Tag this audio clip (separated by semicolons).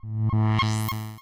ufo; teleport; space; reactor; old; game